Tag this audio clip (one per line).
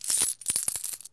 Coin
Coins
Currency
Game
gamedev
gamedeveloping
games
gaming
Gold
indiedev
indiegamedev
Money
Purchase
Realistic
Sell
sfx
videogame
Video-Game
videogames